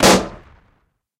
Balloon popping. Recorded with Zoom H4